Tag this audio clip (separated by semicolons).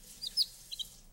canary; whistle; chirp; bird